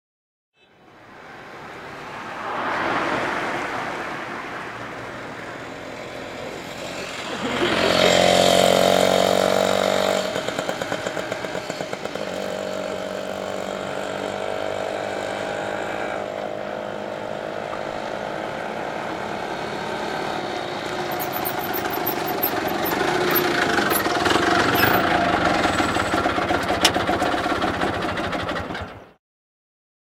Auto Rickshaw - Approach, Stop
Bajaj Auto Rickshaw, Recorded on Tascam DR-100mk2, recorded by FVC students as a part of NID Sound Design workshop.
Tuk, India, Auto, Ric, Rick, Autorickshaw, Richshaw